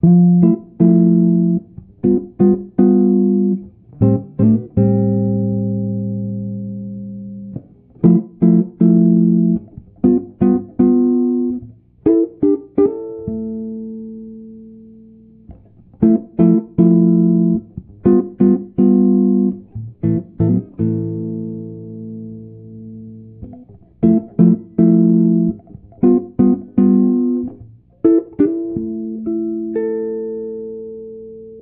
Fmaj7 jazzy 120bpm
chords, guitar, jazz